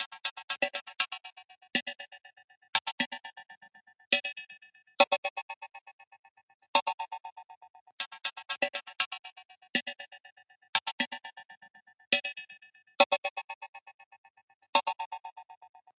Sample made in april 2018, during participatory art workshops of field-recording and sound design at La Passerelle library Le Trait d'Union youth center, France.
Sample 1
Cash register with multiple delays.
Sample 2
Piece on drum with slow audio.
Sample 3
Ride cymbal with reverb.
Sample 4
Torn paper with bitcrushing.
Sample 5
Trash bin percussion with reverb.
Sample 6
Quantized trash bin rythm.
Sample 7
Percussion on metal and shimmer
Landscape 1
Morning view from the banks of the Saone, around Trévoux bridge, France.
Landscape 2
Afternoon carnival scene in Reyrieux, France.